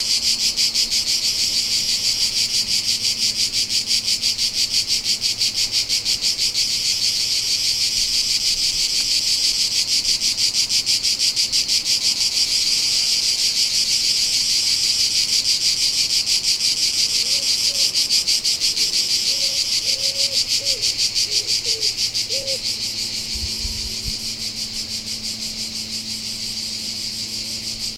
Recorded in Montpellier using a Rode's videomic pro